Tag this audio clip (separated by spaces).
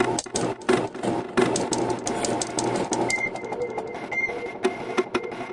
acid breakbeat drumloops drums electro electronica experimental extreme glitch hardcore idm processed rythms sliced